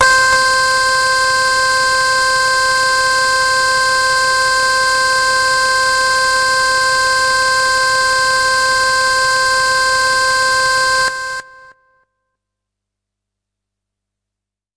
Harsh Lead - C4
This is a sample from my Q Rack hardware synth. It is part of the "Q multi 010: Harsh Lead" sample pack. The sound is on the key in the name of the file. A hard, harsh lead sound.
electronic, hard, harsh, lead, multi-sample, synth, waldorf